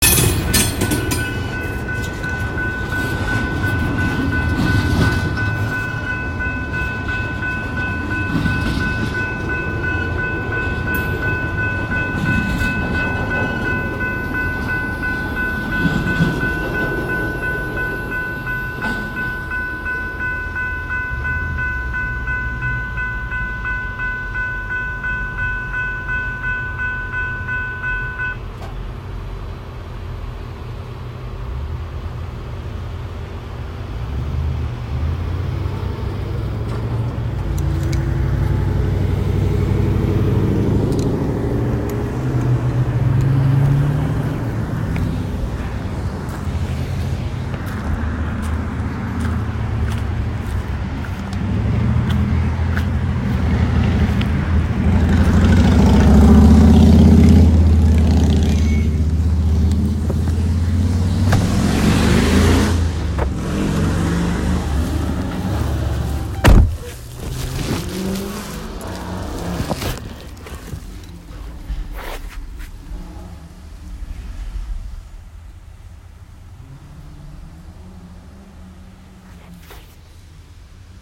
A freight train rolling past at a fairly high speed, with dinging warning bells from the RR crossing.